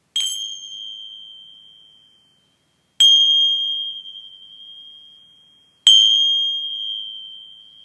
Ancient Tibetan chime